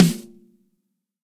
BDP SNARE 002
Snare drums, both real and sampled, layered, phase-matched and processed in Cool Edit Pro. These BDP snares are an older drum with a nice deep resonance. Recorded with a Beyer M201N through a Millennia Media HV-3D preamp and Symetrix 501 compressor.
snare, drum, real, processed